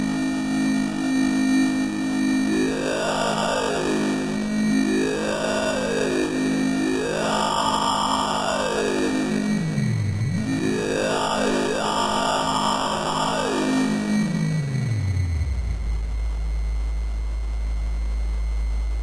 Drone sound created with a Korg Monotron Duo and Ableton Live 8, using Live's built in effects.
There is a vocal character to this sound at times, but I assure you that absolutely no vocal sounds were used and no vowel filter was used (or other fancy filters). The only filter in the signal chain is the monotron own filter, although there were a number of Live's own built in effects.
Ableton-Live-8, Korg, Monotron-Duo, deep, growling, noise